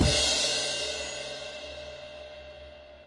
Modern Roots Reggae 13 078 Gbmin Samples
078, 13, Gbmin, Modern, Reggae, Roots, Samples